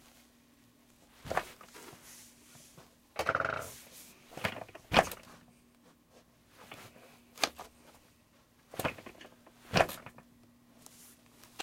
Sitting in computer chair-GAIN 02-01
Sitting in a computer chair